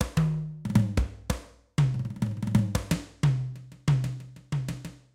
ethnic beat8
congas, ethnic drums, grooves
grooves, percussion, drum, congas, percussive, ethnic, drums